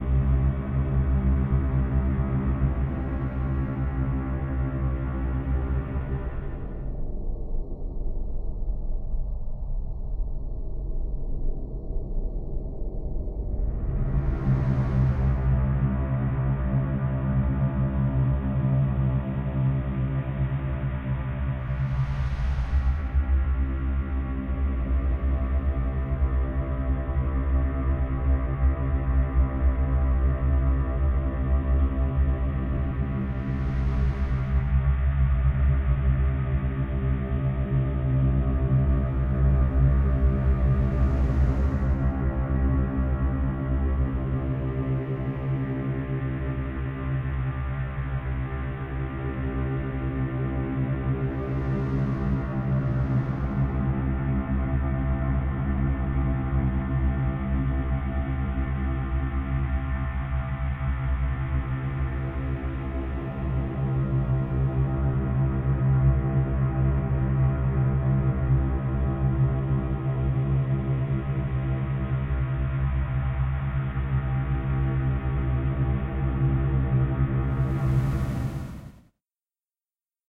Dark Ambient backgrounding 001
Creepy deep electronic sound to use as a background. A mix of basses and harmonics.
ambient, atmosphere, background, creepy, dark, deep, electronic